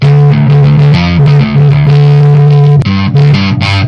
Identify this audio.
Cool loop-able metal riff made by me recorded straight from my amp, using bass guitar and pedal for guitar-like sound. My 6th sound in Metal Loop Pack.